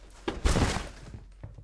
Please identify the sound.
bag down 4
Can be used as a body hit possibly.
bag, body-hit, impact, rucksack